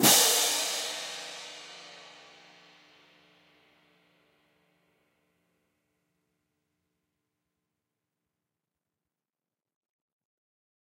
Marching Hand Cymbal Pair Volume 22
This sample is part of a multi-velocity pack recording of a pair of marching hand cymbals clashed together.